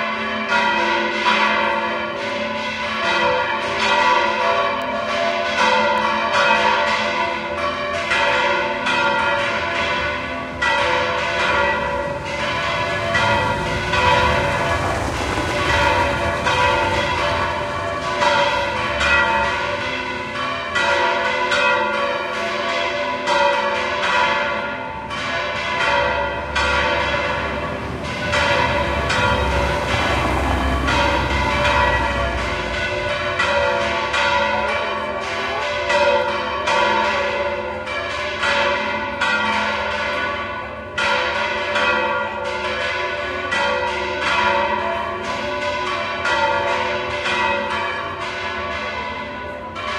20090419.san.pedro.bells
The bells of San Pedro church, Seville. Shure WL183 into Fel preamp, Edirol R09 recorder
bells, church, field-recording, seville